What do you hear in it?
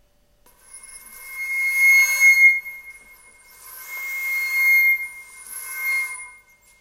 Flute Play C - 10
Recording of a Flute improvising with the note C
Flute, Instruments